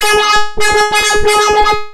I thought the mouse "touchpad" of the laptop would be better for scratching using analog x's scratch program and I was correct. I meticulously cut the session into highly loopable and mostly unprocessed sections suitable for spreading across the keyboard in a sampler. Some have some delay effects and all were edited in cooledit 96.
dj, rap, vinyl